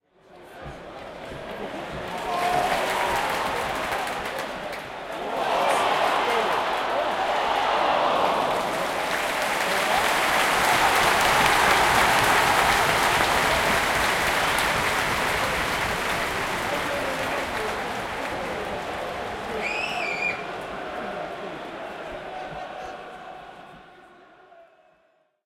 Football Crowd - Near miss ooh 2 - Southampton Vs Hull at Saint Mary's Stadium
Recorded at Southampton FC Saint Mary's stadium. Southampton VS Hull. Mixture of oohs and cheers.